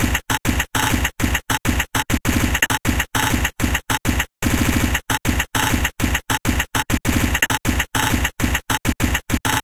200BPM chiptune amen break
amen, hardcore, break, 8bit, 200bpm, breakcore, breakbeat, drumloops, amenbreak, chiptune